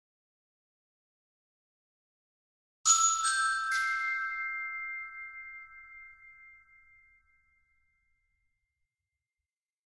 Bright Glockenspiel sound played in a single arpeggio
Glockenspiel, Bells, bright